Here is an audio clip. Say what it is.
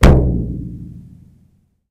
Mono samples of a small children's drum set recorded with 3 different "sticks". One is plastic with a blue rubber tip that came with a drum machine. One is a heavy green plastic stick from a previous toy drum. The third stick used is a thinner brown plastic one.
Drum consists of a bass drum (recorded using the kick pedal and the other 3 sticks), 2 different sized "tom" drums, and a cheesy cymbal that uses rattling rivets for an interesting effect.
Recorded with Olympus digital unit, inside and outside of each drum with various but minimal EQ and volume processing to make them usable. File names indicate the drum and stick used in each sample.